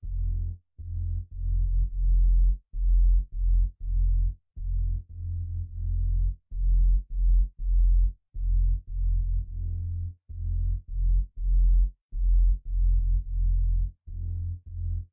Part of 7 sounds from Corona sound pack 01\2022. All sounds created using Novation Bass Station II, Roland System 1 and TC Electronics pedal chain.
Unfinished project that I don't have time for now, maybe someone else can love them, put them together with some sweet drums and cool fills, and most of all have a good time making music. <3